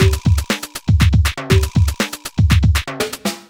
Food Beats 1
Roland MC-303 drumkit.
beats, drumloop, drums, hip-hop, loop, old-school